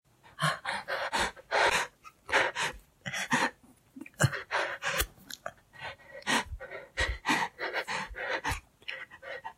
Scared Breathing 2
Sound Originally used for: Curly Reads: Why i wont go to Mockingbird Park [Creepypasta]
Recorded with a Iphone SE and edited in Audacity